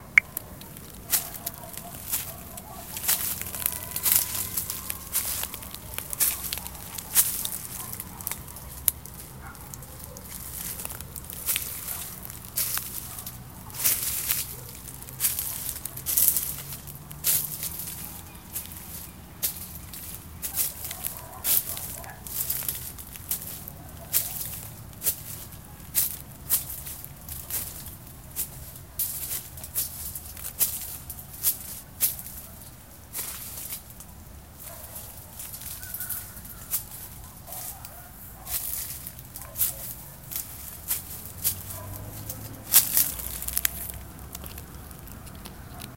Passos em folhagens
Caminhando sobre folhas secas e gravetos.
Estação: Outono
* Foi gravado bem perto das folhas, mas ainda sim há vazamento de sons que vem de fora da fazenda. Basta uma varredura de frequências indevidas e tudo estará resolvido.
(Footsteps on leaves and gravels)
Gravado com celular Samsung galaxy usando o App "Tape Machine Lite".
(Recorded with Samsung Galaxy using "Tape Machine" App for Android)
16 bit
Mono